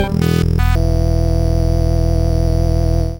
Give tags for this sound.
digital
experimental
harsh
melody
multisample
ppg

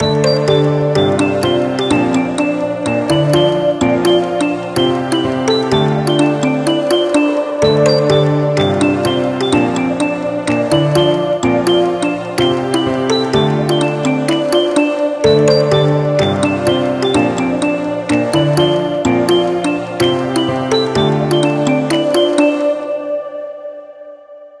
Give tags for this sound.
intresting
story
happy

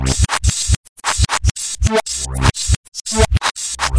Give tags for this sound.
techno
loop
drum
psychedelic

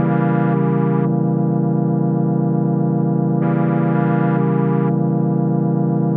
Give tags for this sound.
electronic
sound

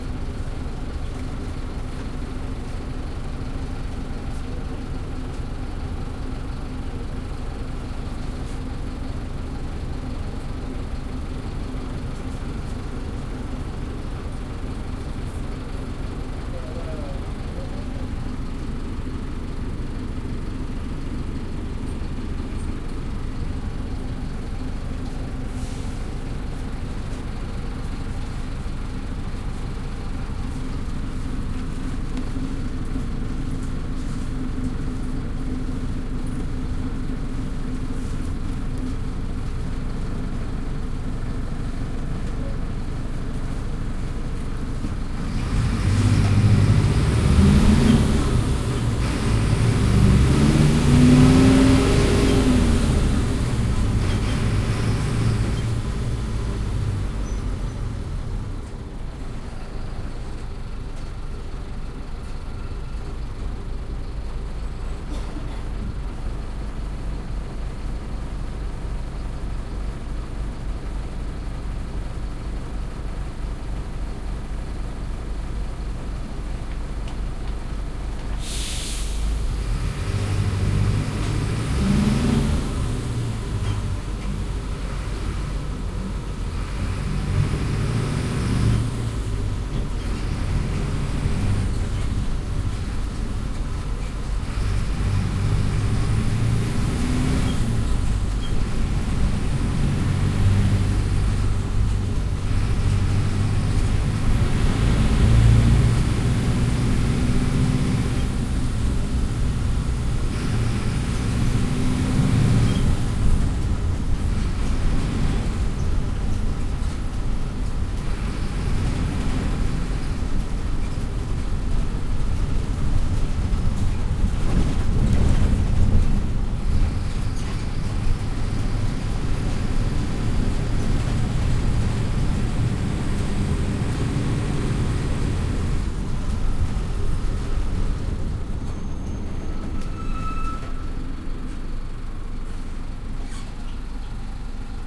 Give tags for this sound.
starter
bus
inside
fiel-recording
day
tucuman
motor
argentina

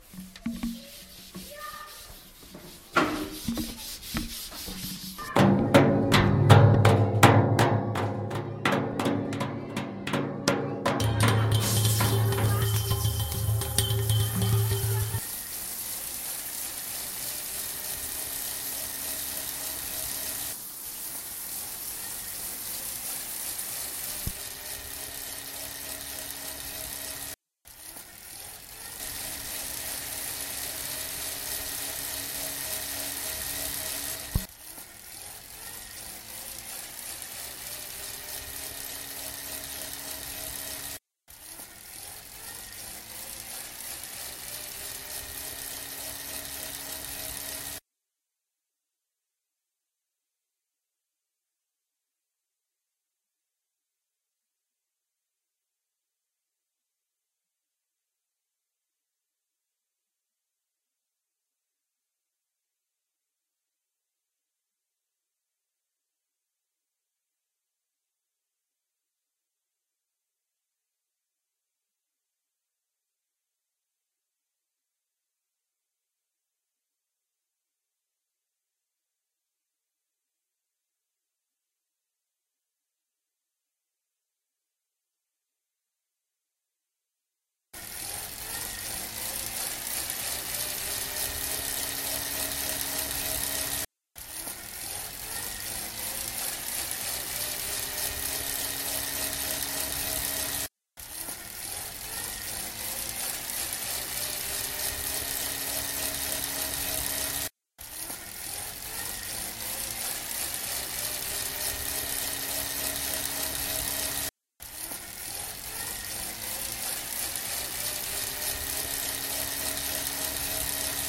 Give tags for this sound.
Pac
Sonicpostcards
France

